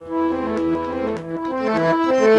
accordeon 25 crescendo
Accordeon crescendo. Recorded with binaural mics + CoreSound 2496 + iRivier H140, from 1m distance.